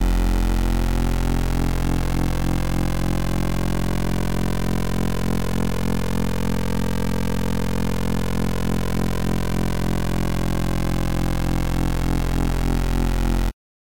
Cool Square F1
analog, square, synth, synthesizer